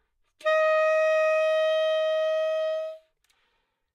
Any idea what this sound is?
Sax Alto - D#5 - bad-attack
Part of the Good-sounds dataset of monophonic instrumental sounds.
instrument::sax_alto
note::D#
octave::5
midi note::63
good-sounds-id::4805
Intentionally played as an example of bad-attack
alto, Dsharp5, good-sounds, multisample, neumann-U87, sax, single-note